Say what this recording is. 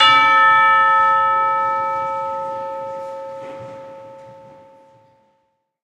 In this case we have managed to minimize audience spill. The mic was a Josephson e22 through a Millennia Media HV-3D preamp whilst the ambient partials were captured with two Josephson C617s through an NPNG preamp. Recorded to an Alesis HD24 then downloaded into Pro Tools. Final edit and processing in Cool Edit Pro.
alesis, arts, audio, avenue, bell, c617, canada, chime, chiming, church, e22, hanging, josephson, live, media, metal, millennia, npng, orchestral, percussion, pulsworks, ring, ringing, saskatchewan, saskatoon, third, tubular, united